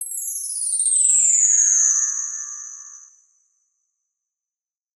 Bar Chimes V6 - Aluminium 8mm - wind
Recording of chimes by request for Karlhungus
Microphones:
Beyerdynamic M58
Clock Audio C 009E-RF
Focusrite Scarllet 2i2 interface
Audacity
bar, bell, chime, chiming, glissando, metal, orchestral, ring, wind-chimes, windchimes